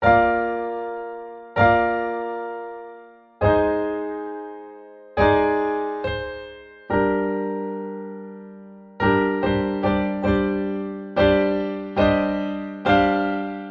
140bpm Piano Solo 11.Apr 14
For use at your leisure. I make most of them at 140 bpm so hopefully one day they make their way into dubstep.
Chop/splice/dice/herbs and spice them, best served piping hot, enjoy.
Fondest regards,
Recorded with Logic Pro 9 using the EXS24 sampler of the steinway piano (Logic Pro default) with a touch of reverb to thicken out the sound.